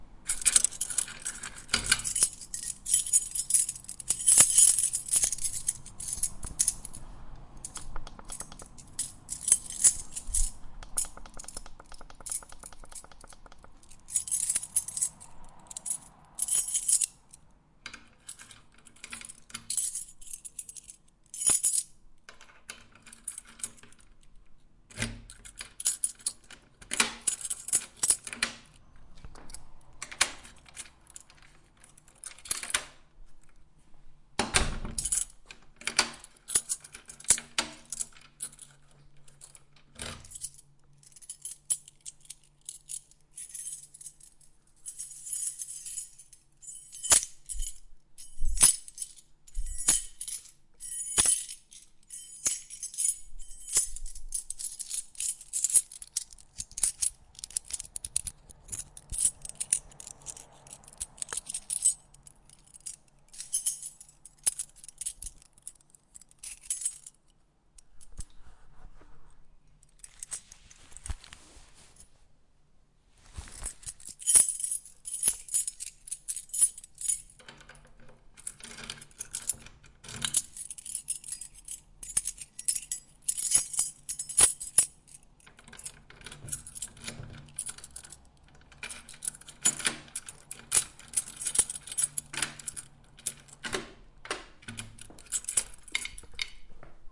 Key Sounds: playing around with keys in hand
clang, cling, iron, key, metal, metallic, shiny, ting